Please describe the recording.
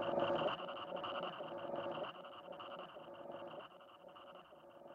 soft
delayed bed of shuffling static with pulses of throaty mid-lo bass purr inter spaced
loud to soft echoing fade out
equipment used: